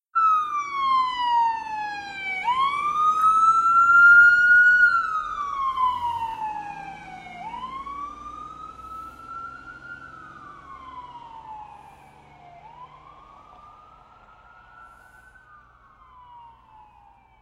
Kanton Levine - my local police siren
I heard a police siren a block or so away so I recorded it - recporded in Arcata California
siren, police-siren, police, emergency